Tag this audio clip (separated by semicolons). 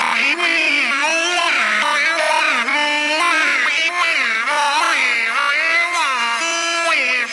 industrial; voice